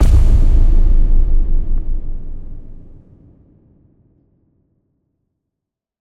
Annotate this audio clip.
Bright Cinematic Boom (Fast Reverb)
This sound has a bright punchy start, and a long, dark, fast pulsating reverb following closely behind.
Alternative version of this with slower pulsing reverb.
boom, bright